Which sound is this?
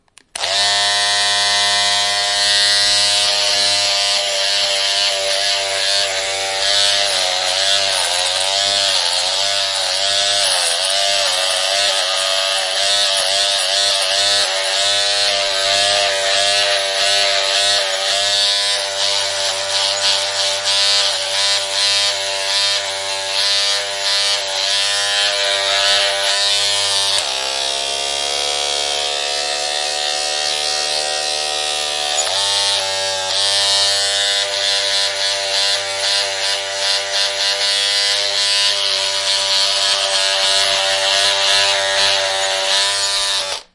Electric shaver (different shaving modes)
Electric shaver + different modes for shaving. Recorded with a Zoom H2n.
The model was a Braun 3773
electric, razor, shaver, shaving